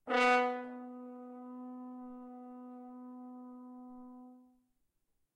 horn sforzando tone B3
A sforzando B3 produced on the horn. Recorded with a Zoom h4n placed about a metre behind the bell.